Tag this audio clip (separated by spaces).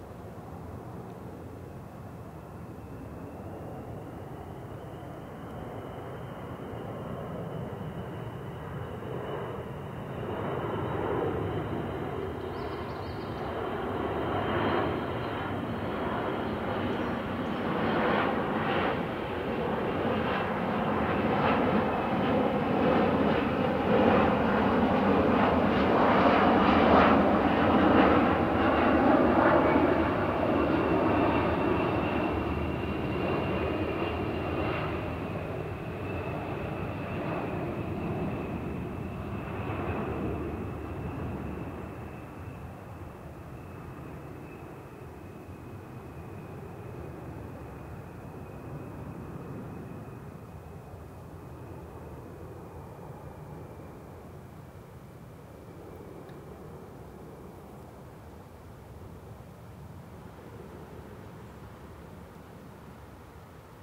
flight aircraft airplane aeroplane passby boeing jet aviation flying plane